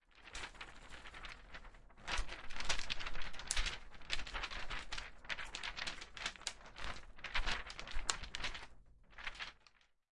Sonido de hojas de papel ondenado al viento
Sound of paper sheets flapping with the wind